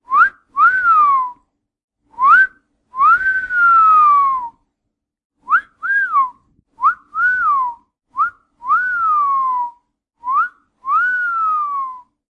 Whistling, like wolves do in cartoons, apparently. Can be interpreted as an offensive expression.
Recorded with Zoom H2. Edited with Audacity.